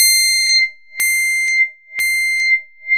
Generate a pluck sound with a 96 MIDI pitch. Add an echo and then a wahwah effect at the last part of each echoes.
echo, pluck, wahwah